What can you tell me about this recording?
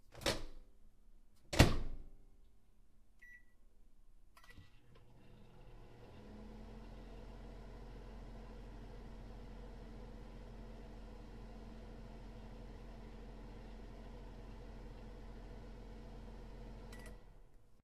Microwave start
popcorn, food, snack, dm152